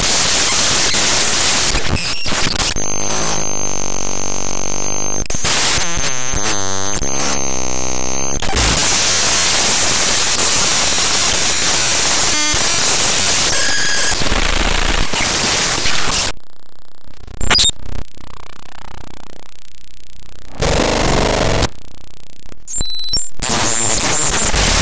Audio glitching noise sample
Mono noise. Was made by processing raw data in the wrong format.
Glitch, Noise, Computer